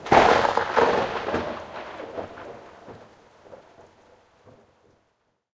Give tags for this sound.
crash; soundeffect; thunder

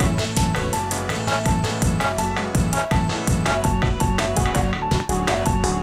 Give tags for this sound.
drumloops; breakbeat; drums; extreme; experimental; acid; processed; idm; sliced; rythms; hardcore; electronica; glitch; electro

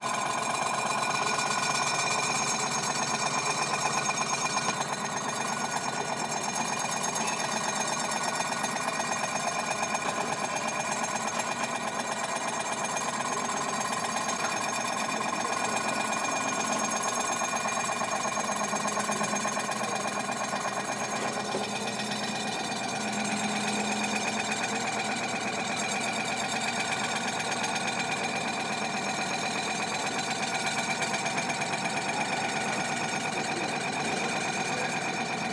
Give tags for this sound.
noisy; clank; metal; rattle; mechanical; faulty; refrigerator; clanks

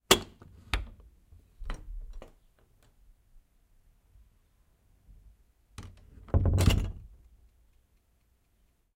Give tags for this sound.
door; wooden

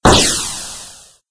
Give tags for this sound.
explosive; game

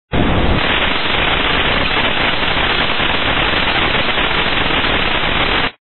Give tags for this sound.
static; carrier-wave; phone

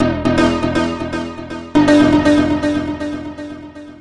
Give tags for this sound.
electronica; loop; synth

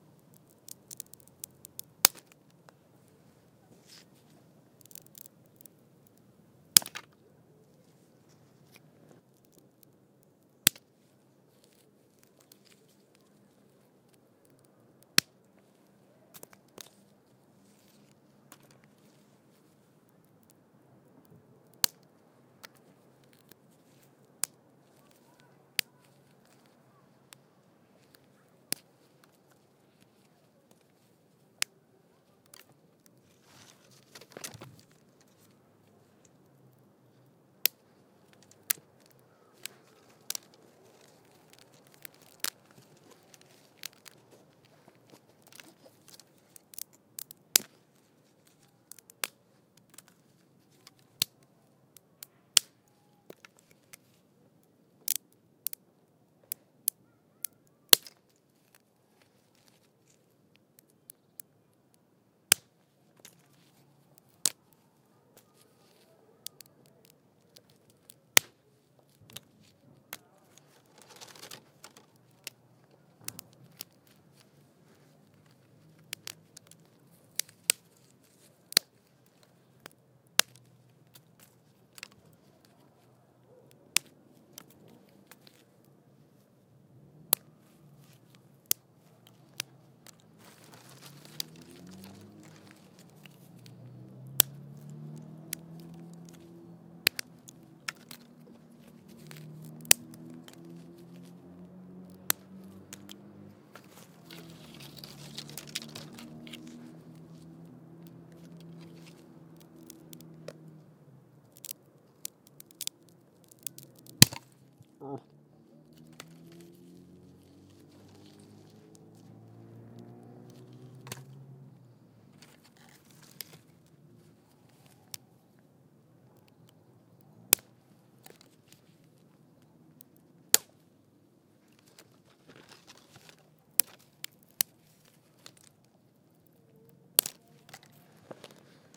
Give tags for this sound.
break; stick; wood; breaking; snap; sticks; forest; crackle

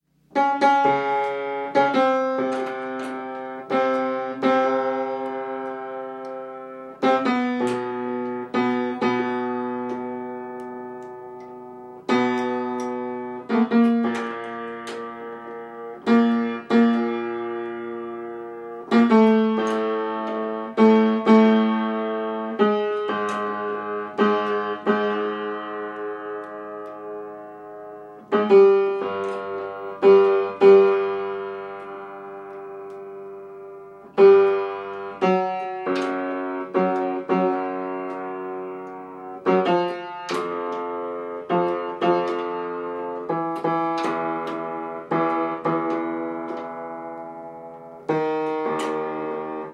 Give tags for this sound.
unprocessed; piano

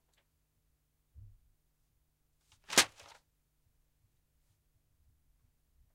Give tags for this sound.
akg; booklet; carpet; channel; close; distant; down; dual; fall; falling; foley; fostex; mono; paper; perspective; pov; rode; sheet; sheets; studio; throw; throwing; unprocessed

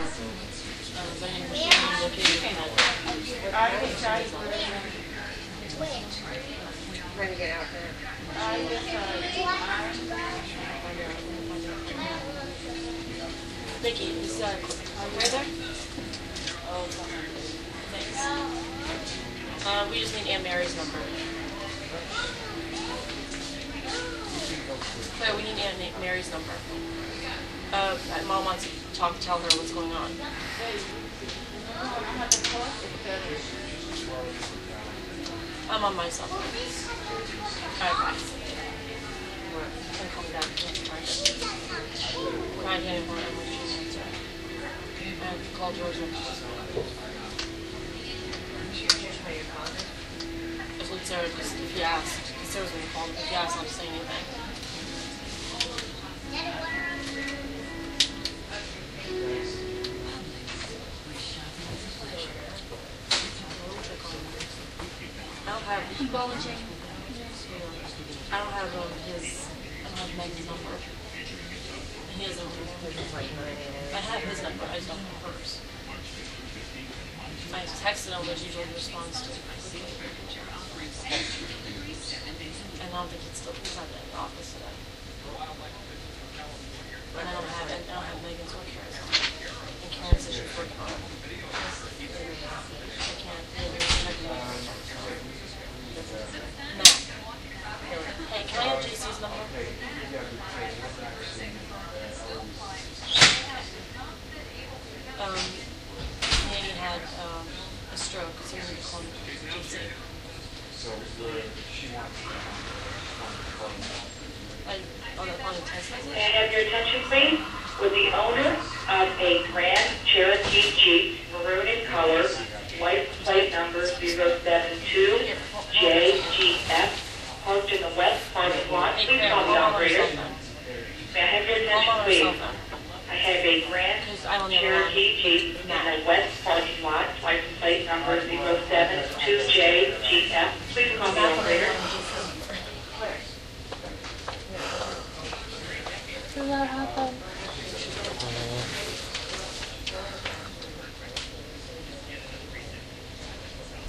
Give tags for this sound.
ambience; emergency; room; hospital

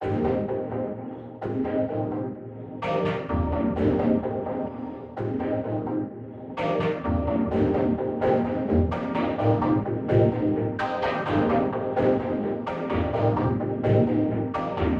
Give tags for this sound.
128; beat; big; chords; complicated; dance; effected; effects; free; fun; house; iris2; keys; LFO; major; manipulated; music; pack; pumping; reverb; sample; space; synth; trap; uzi; vert

